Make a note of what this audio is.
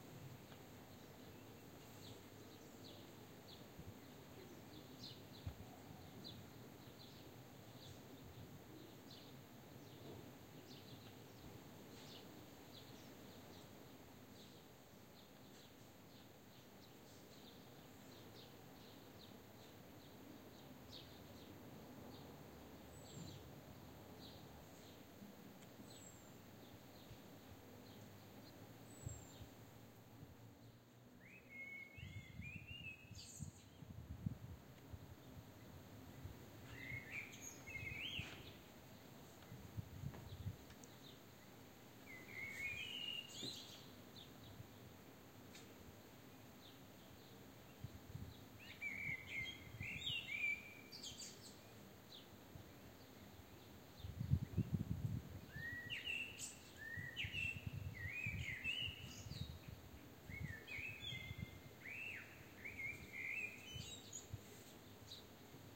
Quiet exterior ambience
Ambiance of a quiet terrace in a sunny day. Birds and wind. Peace.
I am proud if you liked this sound. I ask you to mention me in your work as Martí Morell, it is so easy!
I would like to see how you have used it! Thank you.
atmos, roomtone, background, atmosphere, calm, ambiance, background-sound, ambient, peace, atmo